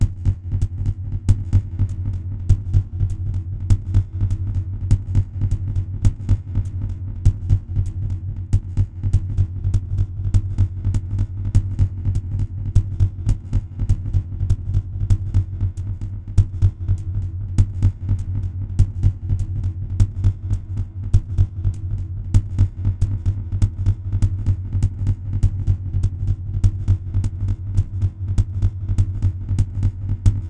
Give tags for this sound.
sounddesign dub drums experimental reaktor

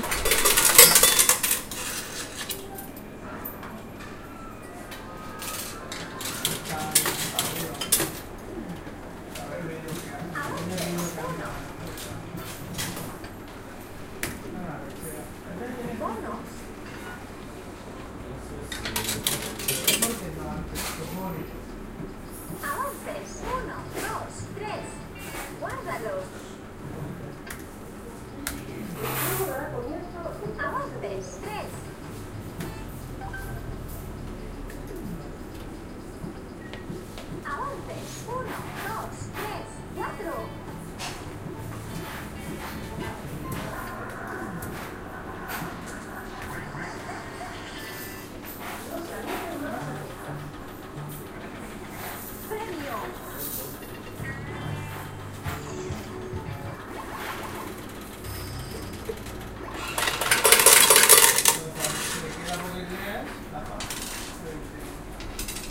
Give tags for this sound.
game sound award coins gameroom machine

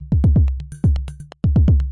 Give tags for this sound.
drumloop electro 125-bpm

